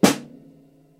Snare Drum sample with AKG-C314
Snare Drum sample, recorded with a AKG C314. Note that some of the samples are time shifted or contains the tail of a cymbal event.
snare, snare-drum, sample, drums, dataset, AKG-C314, drumset